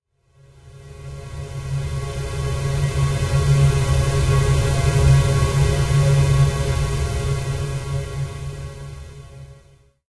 Sideburn Soliloquy
A light buzzy pad sound.